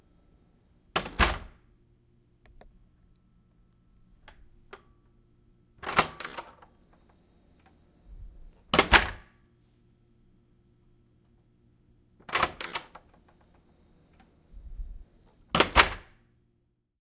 door wood hotel open close1
close1, door, hotel, open, wood